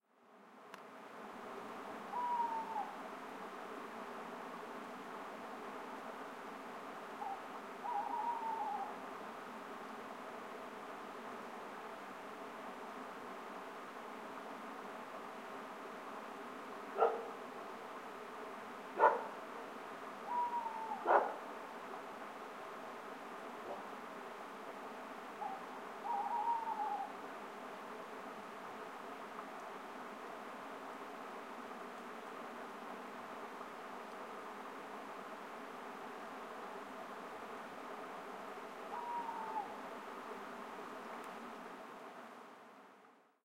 Alone In The Wild
I spent some time in a remote location in a Shepherd's hut. You will hear an owl, a dog and water running in the distance over a weir
field-recording, remote, nature, england, water, owl, bark, weir, countryside, dog